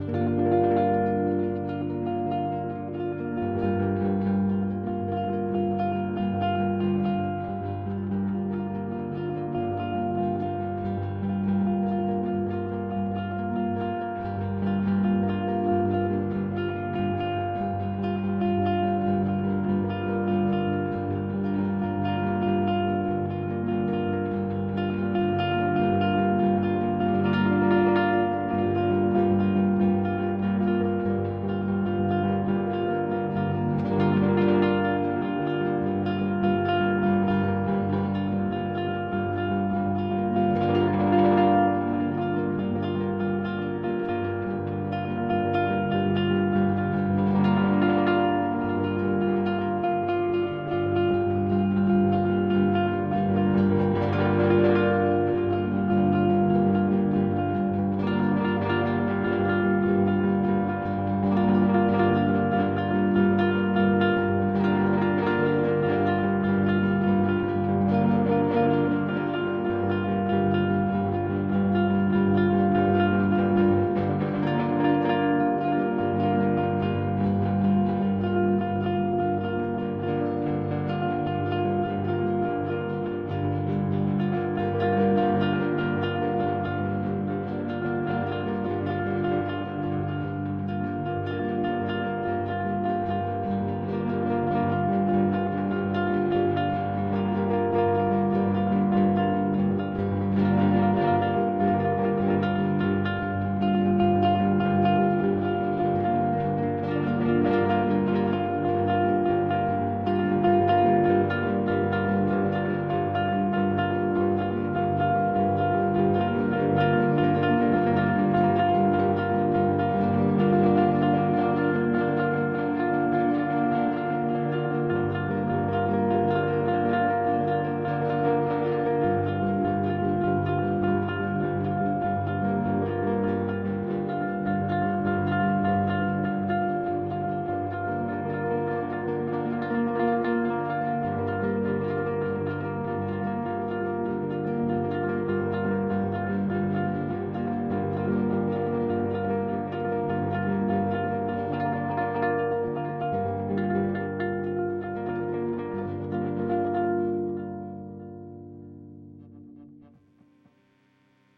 Electric mandocello drone in Gm
An electric mandocello drone in the key of G minor
Mandocello plays the chord of Gm
Performed on an Eastwood "Warren Ellis" series electric mandocello
Can be layered with the other drones in this pack for a piece of music in Gm
drone, electric-mandocello, G-minor, mandocello